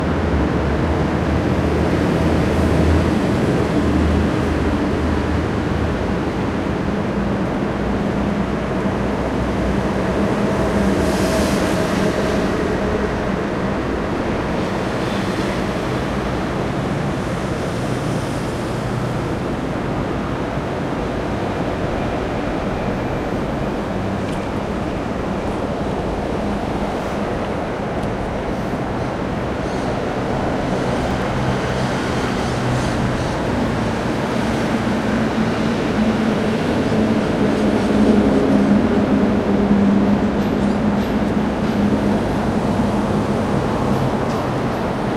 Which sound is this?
20211010 Ronda LitoralCarrerGuayaquil Traffic Noisy Monotonous
Urban Ambience Recording at Ronda Litoral by Guayaquil Street, Barcelona, October 2021. Using a Zoom H-1 Recorder.